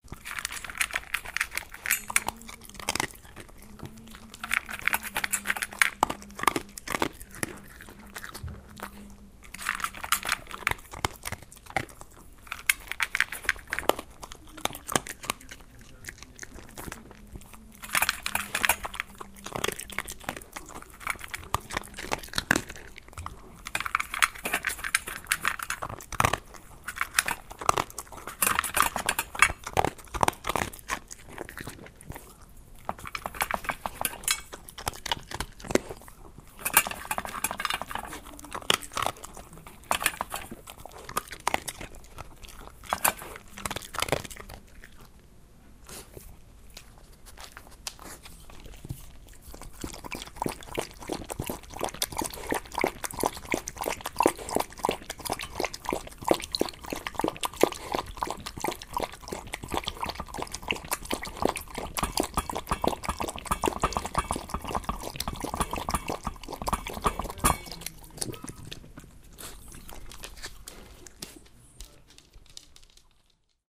eating and drinking dog
drinking, eating, drink, slurping, dog